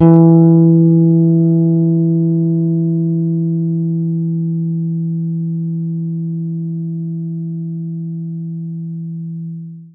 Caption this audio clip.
this is set of recordings i made to sample bass guitar my father built for me. i used it to play midi notes. number in the filename is midi note.
guitar, electric